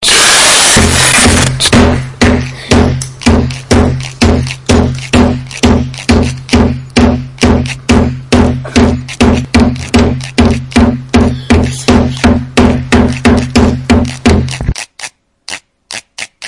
TCR soundscape MFR aylvin-nathan
French students from La Roche des Gr&es; school, Messac used MySounds to create this composition.
France, soundscape, messac